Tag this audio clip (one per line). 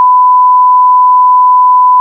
error
cuss
bloop
bleep
beep
glitch